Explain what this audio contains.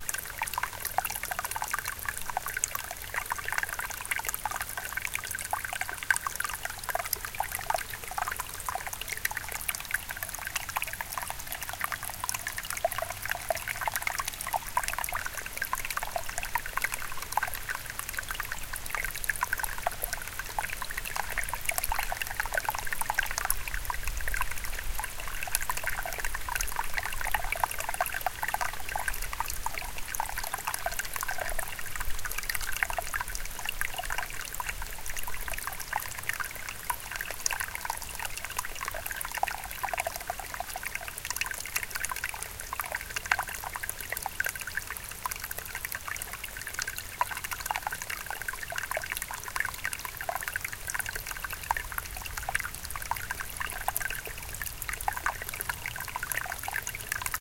Nolde Forest - Small Stream
Small spring flowing out of the ground through rocks along the Watershed Trail in Nolde Forest, Mohnton, PA.
Recorded with a Tascam PR-10.